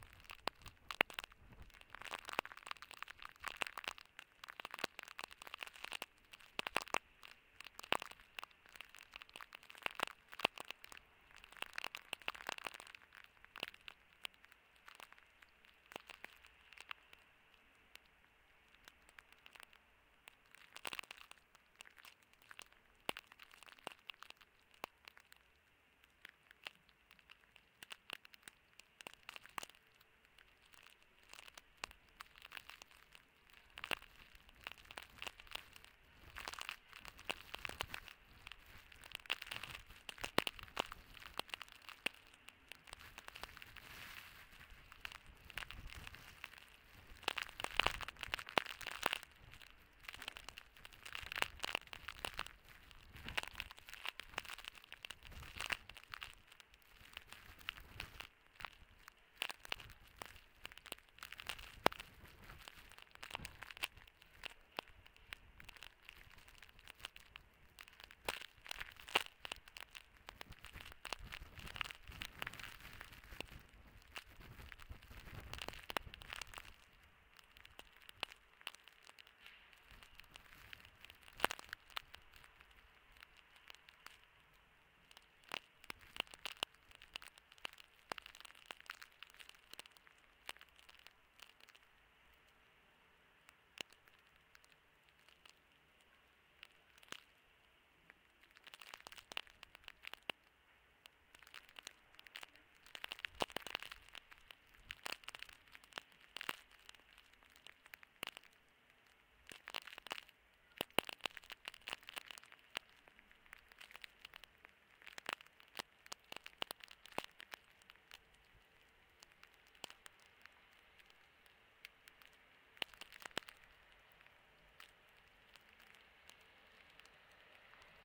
December snow fall. Obviously a very quiet recording. -51dB RMS but with peaks up to -6 dBFS. Playback levels probably best below 50dB SPL. Dolphin Ear Pro hydrophone to Nagra Ares PII+ recorder.